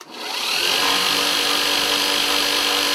Belt grinder - Arboga - On
Arboga belt grinder turned on.